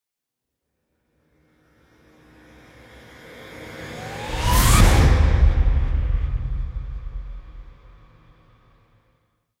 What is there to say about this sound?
Scary Hits & Risers 001
scary, riser, sounddesign, freaky, soundeffect, effect, hollywood, hit, movie, fx, sound, cluster